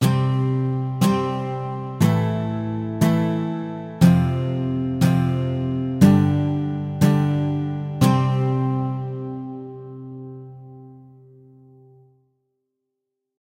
This is my first guitar sample! i hope you like it!
So, i did not record this, but made it on garage band. i first used my keyboard to input raw notes and finalized and put them into order later on. Also, the recording consists into 3 pieces. Chords(Low), Mid-range notes(Mid), and 2 notes together(high) and i put them all three together. Listen, and i hope you like this! :D